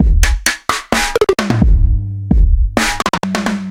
A trap beat made with XLN Addictive Drums in FL Studio 10. 130bpm. Modified the beat using Sugar Bytes Effectrix. 22/10/14.